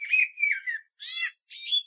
Morning song of a common blackbird, one bird, one recording, with a H4, denoising with Audacity.